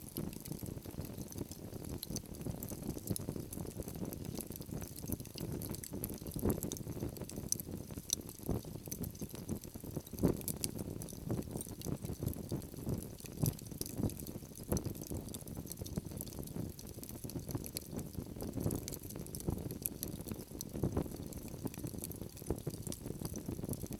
Forge - Coal burning
Coal burning is a forge.
80bpm, 8bar, blacksmith, coal, crafts, field-recording, fireplace, forge, furnace, labor, metalwork, tools, work